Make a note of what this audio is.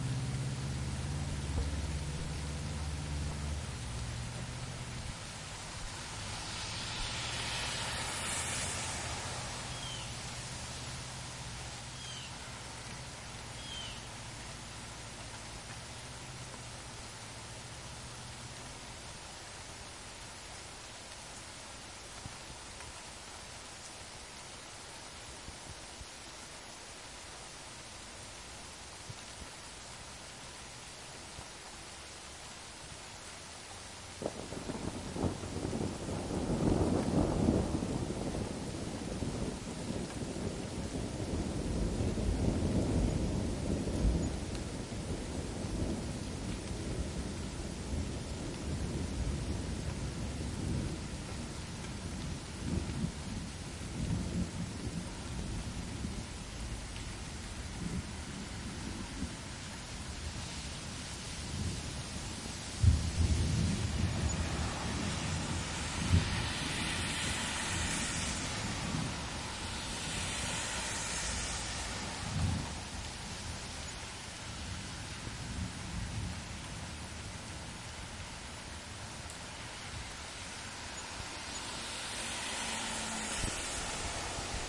Recording in Woodbridge, VA during a rainy day in May 2016. You hear rain gently falling, cars passing by in the rain and the distant rumbling of thunder.